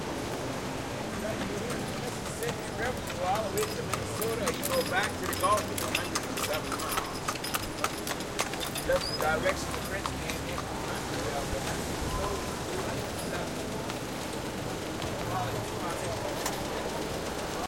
Horse Buggy Tour Guide New Orleans
Recorded with an H4n Zoom in the French Quarter New Orleans.